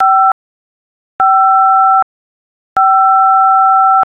The '5' key on a telephone keypad.